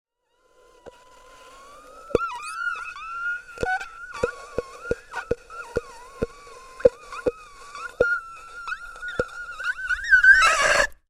voice whine scream
scary air and whining noises made with the back of the throat
scary, MTC500-M002-s13, scream, whine, strange